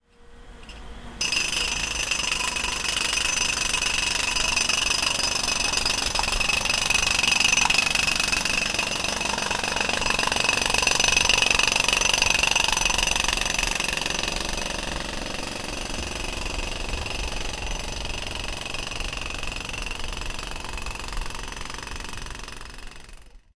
Construction, Jackhammer Manual, A
Raw audio of construction work in a car park in Guildford. A manual jackhammer was being used which I recorded as I walked past.
An example of how you might credit is by putting this in the description/credits:
The sound was recorded using a "H1 Zoom recorder" on 3rd October 2017.